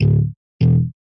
Bass Notes(Raspier) 001
This sample was made in Ableton 7, using Raspier V1.0 a bassguitar VST plugin, further processed through a bassguitar amp simulator (from the Revalver MkIII VST plugin).Raspier is a free VST plugin. I tried it out but could never get a convincing acoustic or electric guitar sound out of it. SO I lost interest and there it lingered for many months on my VST directory.More recently I found it again on the internet, but on reading the text on the webpage, it was described as a bassguitar. I remembered I accidentally had got some nice bass sounds out of it when I first tried it so I gave it another go.The results are in this sample pack, and I think they are quite good.For best results you want to put it through a Bassguitar Amp + cabinet simulator.